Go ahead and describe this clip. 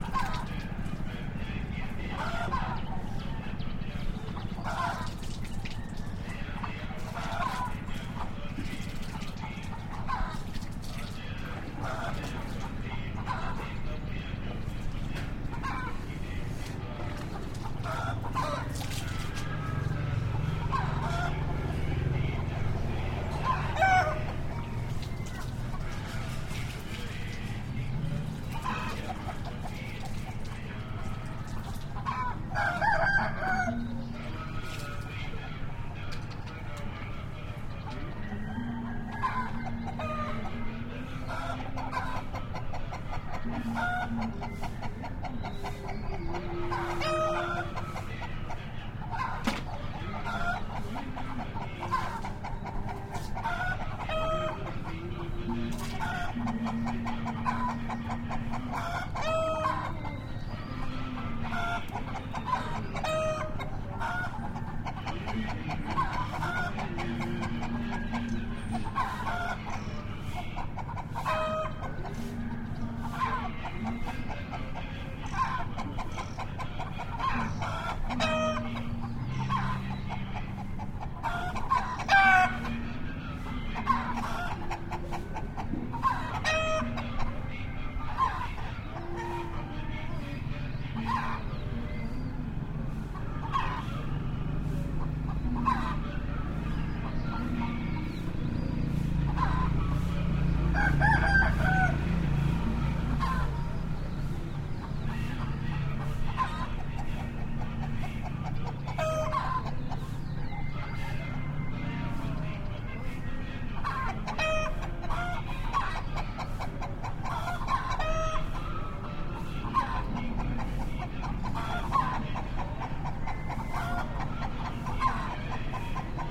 Luang Prabang Morning
City, East, Laos, Luang, Morning, Prabang, South